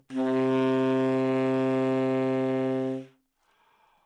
Part of the Good-sounds dataset of monophonic instrumental sounds.
instrument::sax_tenor
note::C
octave::3
midi note::36
good-sounds-id::5240
Intentionally played as an example of bad-attack bad-timbre bad-richness